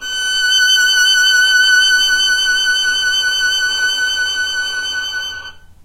violin arco vibrato